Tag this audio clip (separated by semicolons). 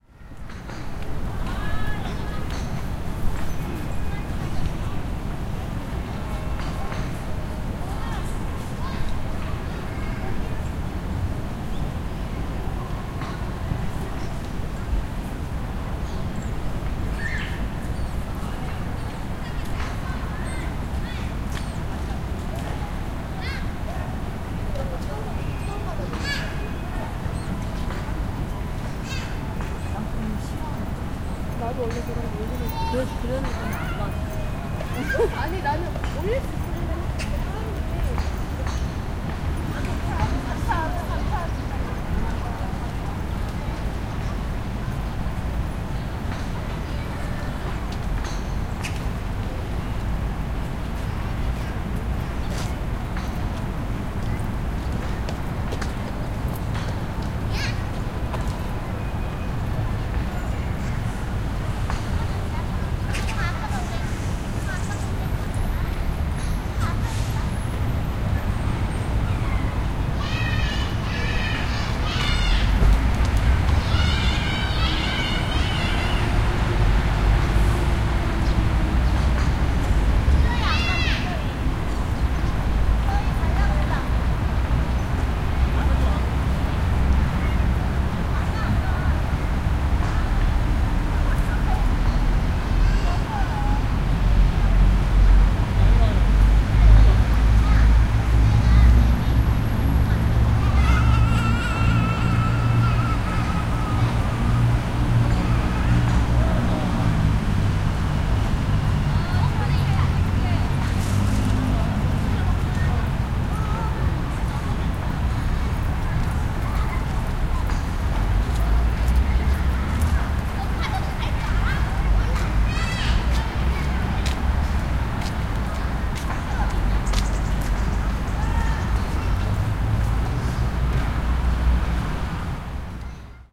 field-recording; korean; seoul; golf; voice; footsteps; korea